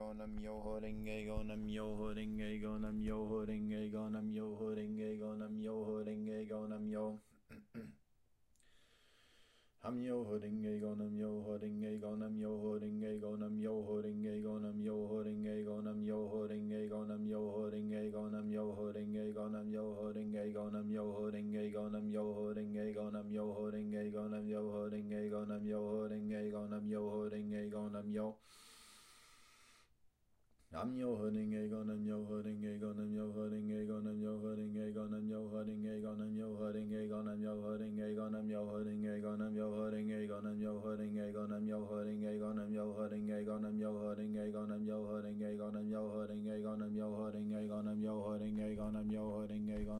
Buddhist Chanting
Most common latter day chant.
shoshu, nam-myoho-renge-kyo, nicheren, buddhist, chanting